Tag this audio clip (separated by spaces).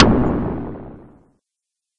FM collab-1 frequency-modulation perc percussion synth synthesized